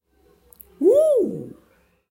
52) Man intrigued
The sound of a man surprised / intrigued
foley, intriged